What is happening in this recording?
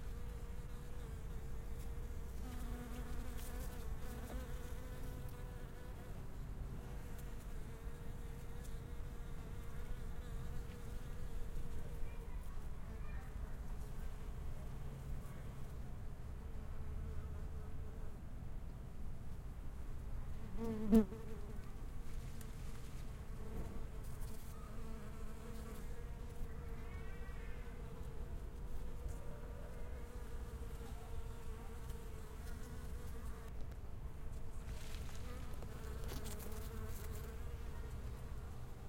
recording of bees flying around in the garden.
ambience, bees, humming, bee, insects, garden